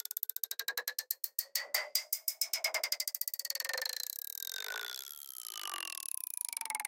Comb Tooth FX
sci-fi, synth, psytrance, synthesis, sound-design, sfx, effect, noise, Psy, fx, psychedelic, digital